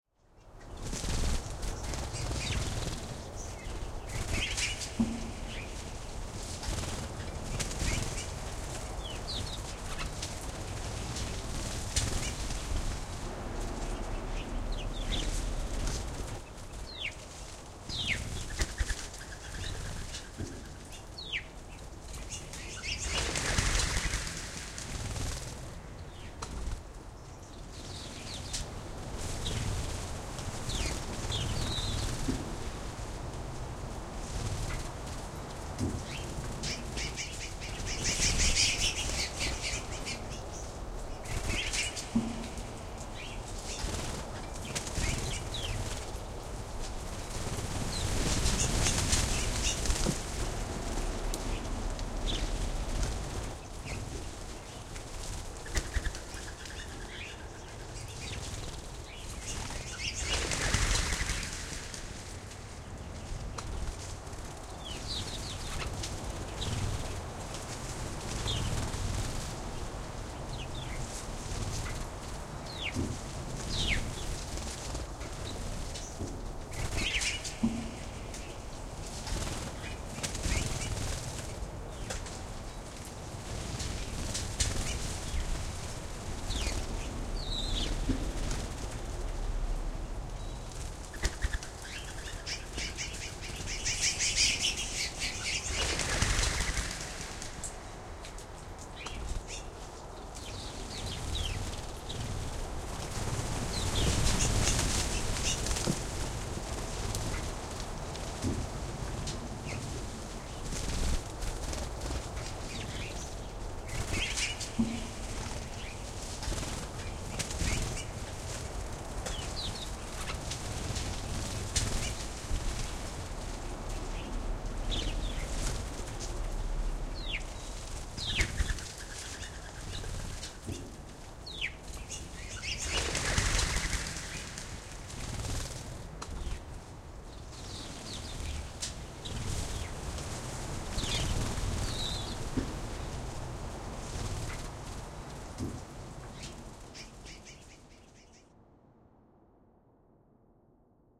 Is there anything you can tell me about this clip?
Slightly abstract ambience of birds flying, wings flapping.